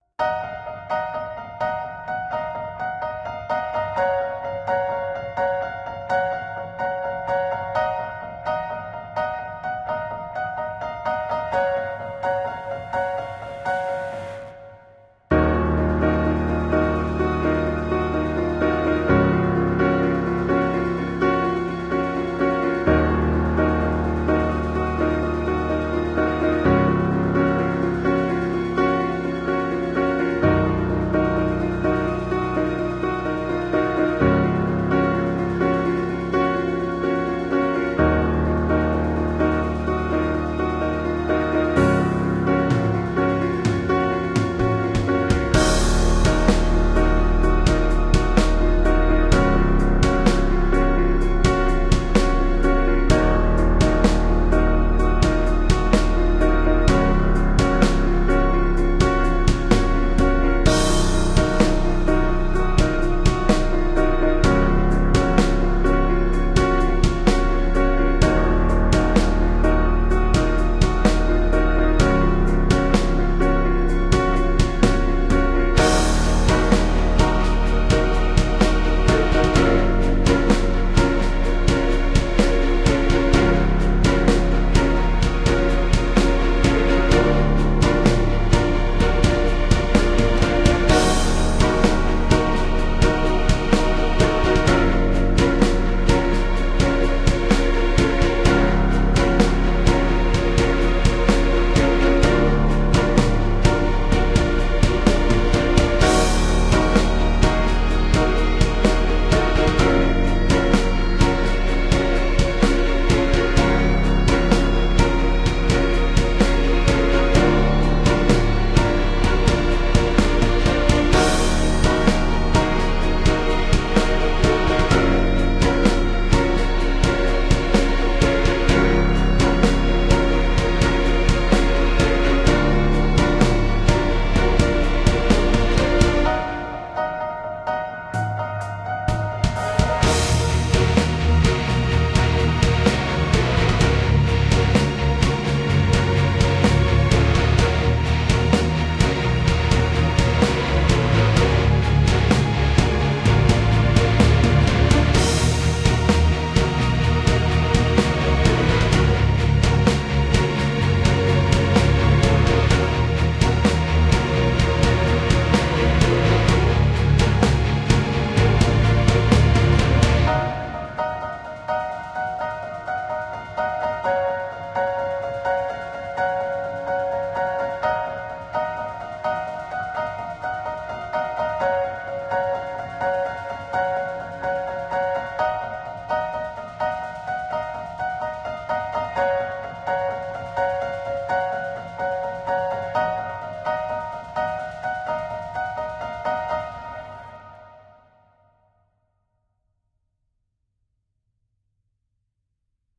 Genre: Cinematic
Track: 64/100
Trying my new drum library.